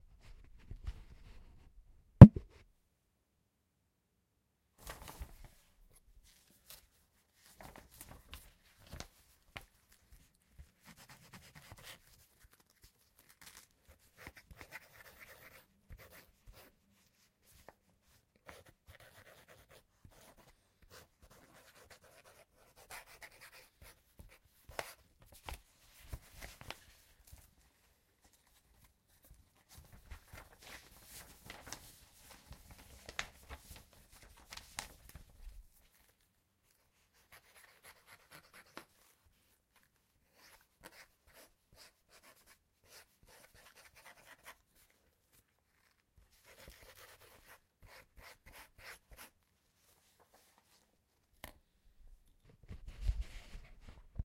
Quill and Parchment
Writing with a quill pen on some parchment... as you do. Recorded with a Zoom H1 as ever.
Parchment, Quill, Writing